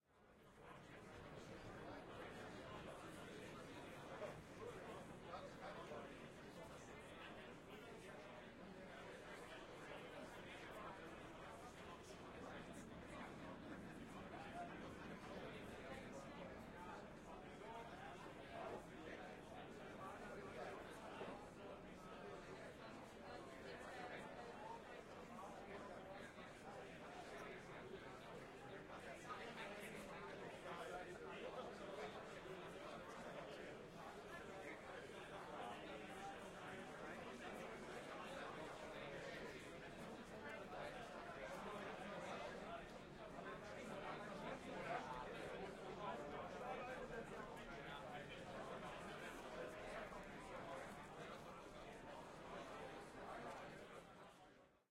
Amsterdam Atmos - Museumplein - Crowd, Cobra cafe, 50 businessmen chatter on outside terras + more heard inside trough open doors @ 8 mtr
About 50 businessmen chatter after visiting a convention, very lively, international, English, German and other languages heard. This one recorded @ 8 mtr. See other recordings for different perspectives.
ambience, atmosphere, chat, crowd, male, people